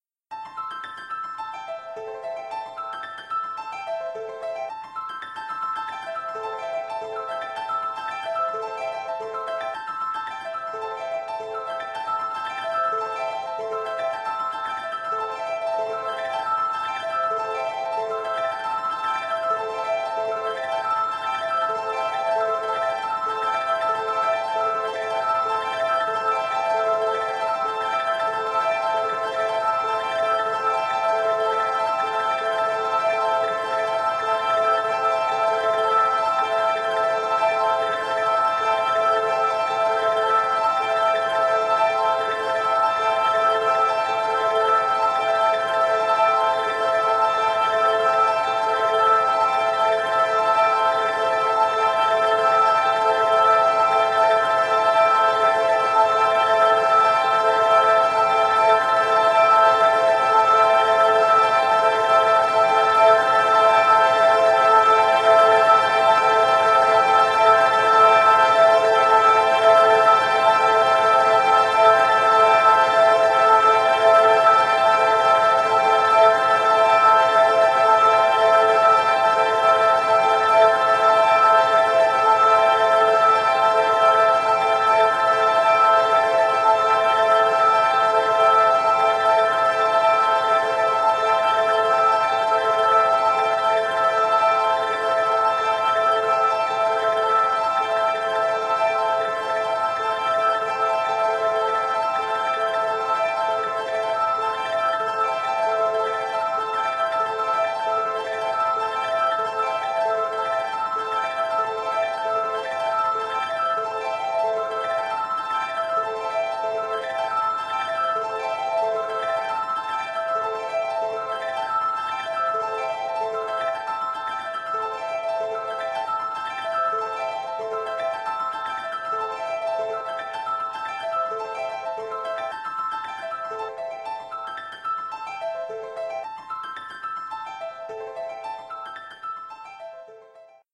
A layering of 100 piano mayor C-chords appregio.
100, appreggio, keyboard, mayor, piano